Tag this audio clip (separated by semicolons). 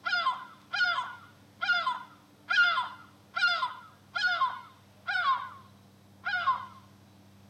birds; birdsong; field-recording